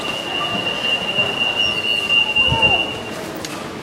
Asda security alarm short
Louder recording of the security alarm at my local Asda.
alarm, security, supermarket, field-recording, asda, beep